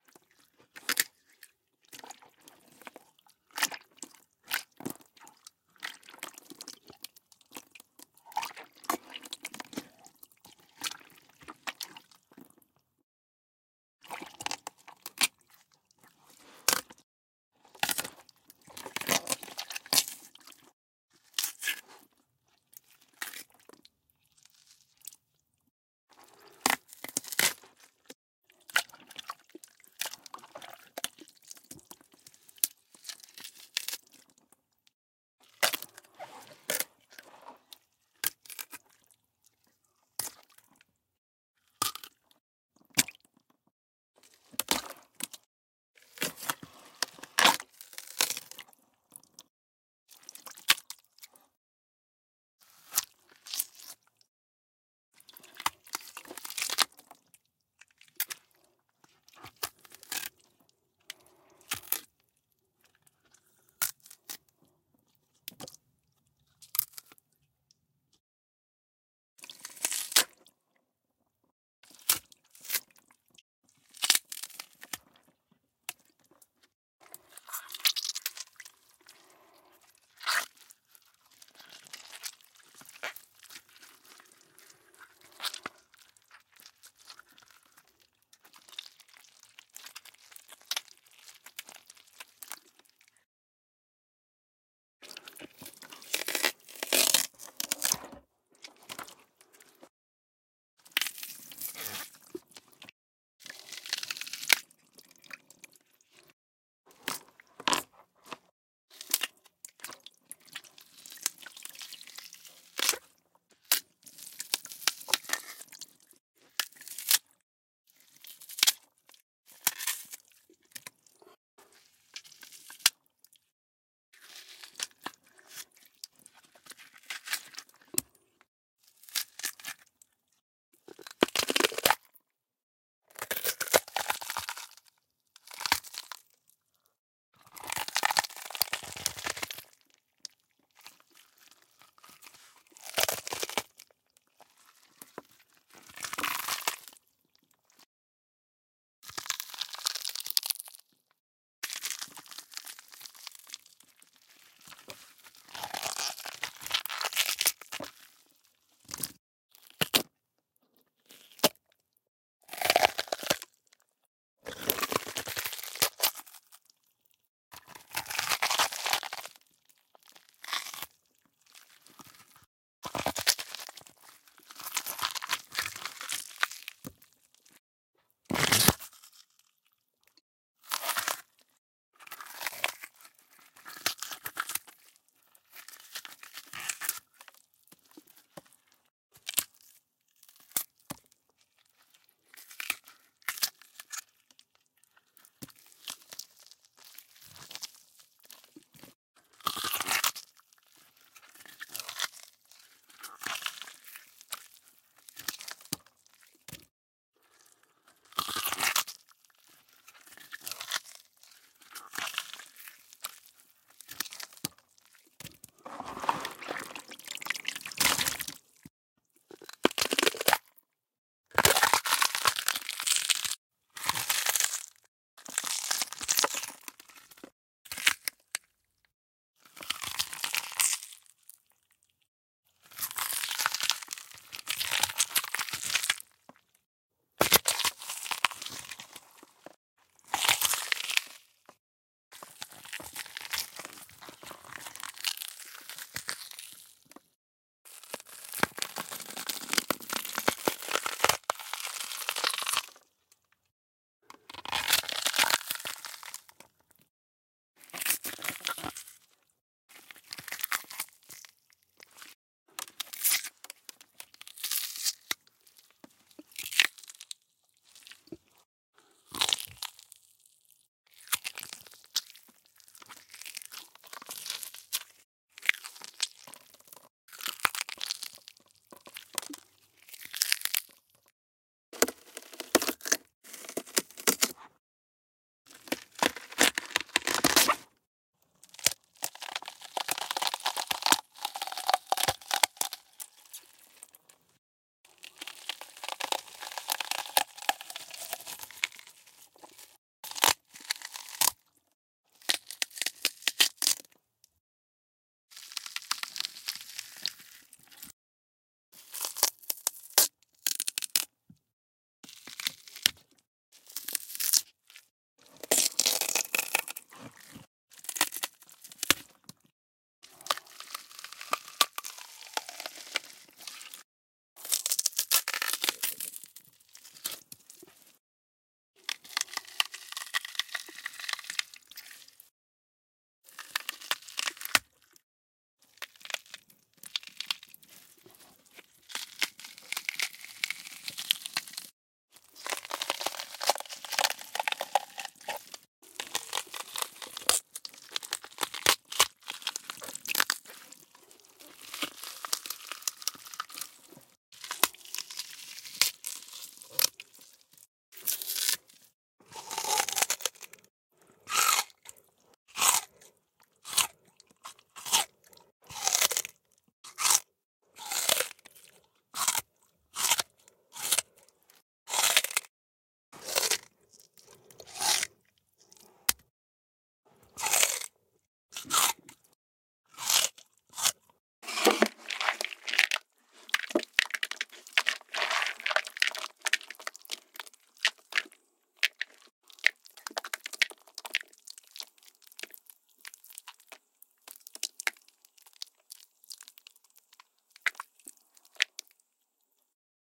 gore gory blood smash flesh murder bone break
Recording a bit gore sound
gore, blood, tear, squelch, flesh, gory